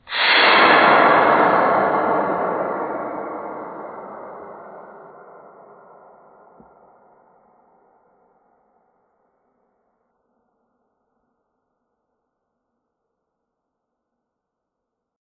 Cinematic Swoosh
I slowed down my recording of a bottle of coke being opened in Audacity to produce a simple, cheapish cinematic flyby. It should be played when a logo appears I think.